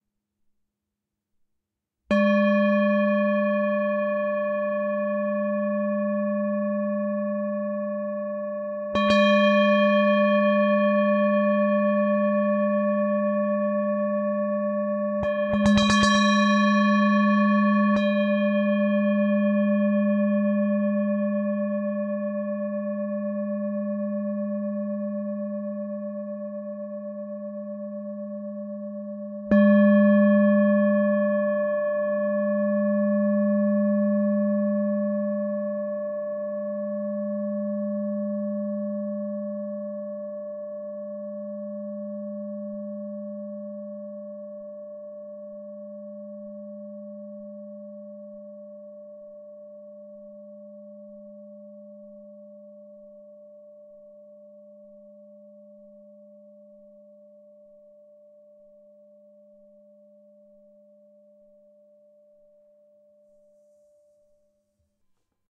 Himalayan Singing Bowl #16
Sound sample of antique singing bowl from Nepal in my collection, played and recorded by myself. Processing done in Audacity; mic is Zoom H4N.
bell, bowl, brass, bronze, chime, clang, ding, drone, gong, harmonic, hit, meditation, metal, metallic, percussion, ring, singing-bowl, strike, tibetan, tibetan-bowl, ting